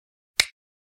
Different Click sounds
lego, stone, Click